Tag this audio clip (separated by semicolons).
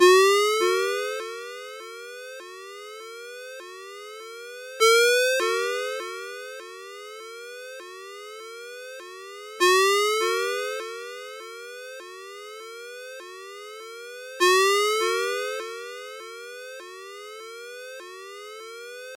cell; cell-phone; cellphone; mojo; phone; ring; ringtone